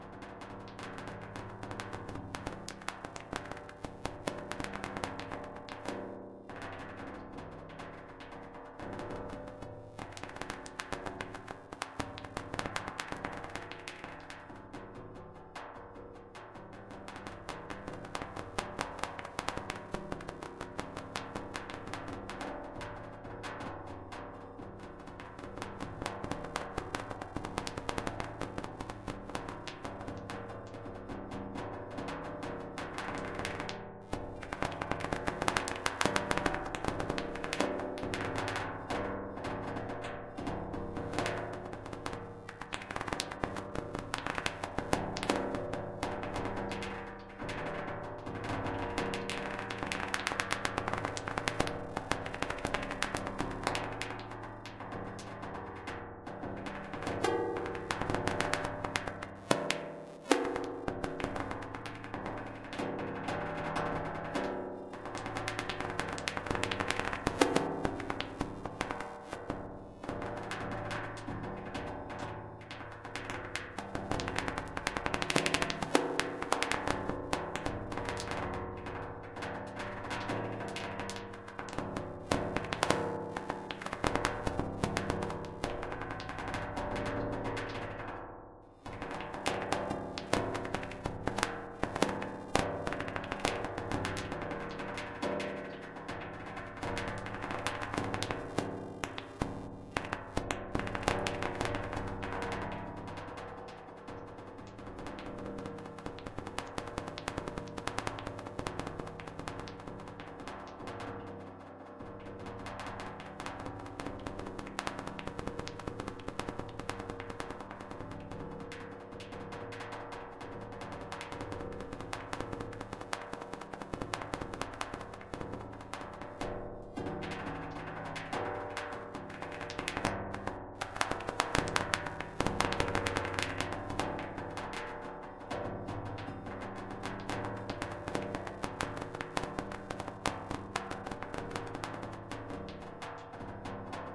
Raining Drops in Sheet Metal
Synthesized sounds of rain drops in some kind of sheet metal. Created in Super Collider programming language.
Drops, Metal, Raining